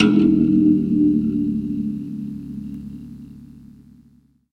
String 2 of an old beat up found in my closet.
acoustic, instrument, noise, string